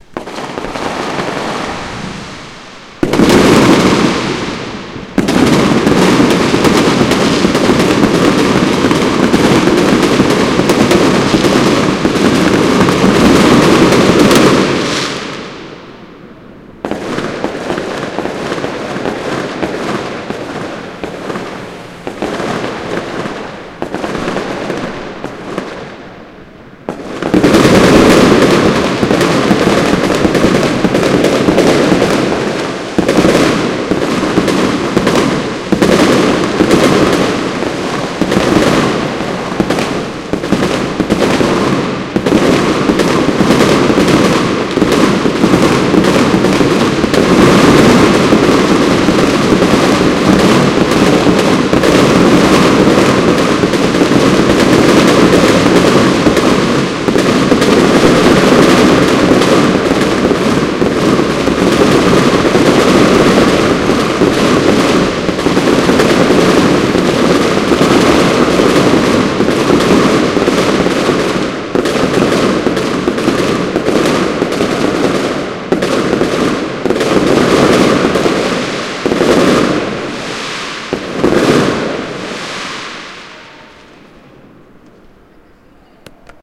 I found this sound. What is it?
Feuerwerk am Abend2
Part 2 of a very loud and professional firework i recorded from my flats window in 2012.
recorded with Tascam DR2-D (internal microphones).
recorded by "Tonstudio Das-Ohr"
ambience, fight, firework, street, war